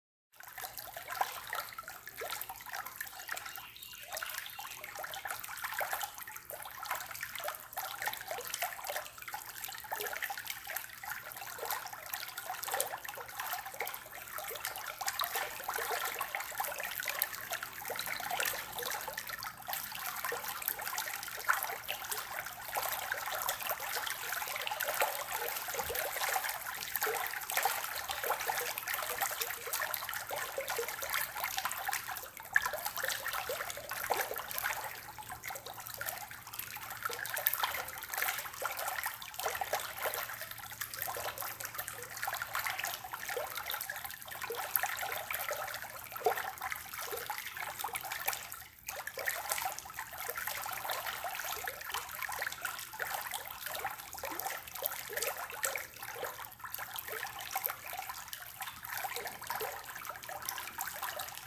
Water babbling1
60 sec recording .... lower volume so some bird chirps are in there as well.
water, lapping, gurgling, babbling